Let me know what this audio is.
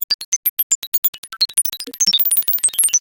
Metallic sound first granulated, then combfiltered, then waveshaped. Very resonant.
comb, grain, metal, resonance, waveshape